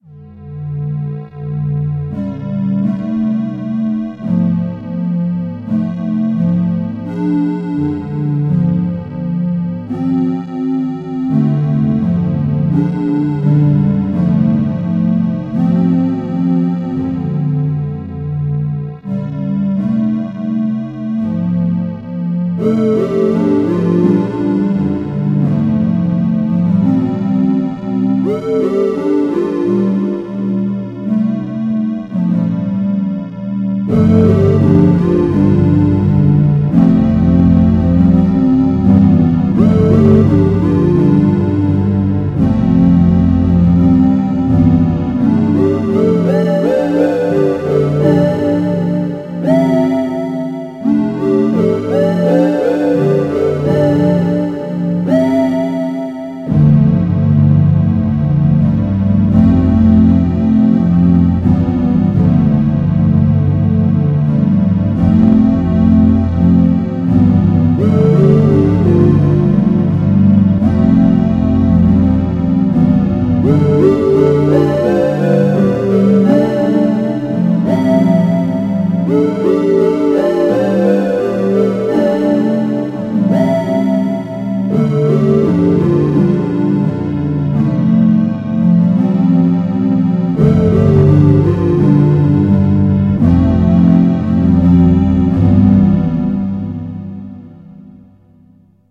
Same Sequence Different Nord Lead settings